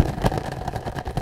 ferrero-küsschen-dose
SonicSnaps, Essen, January2013, Germany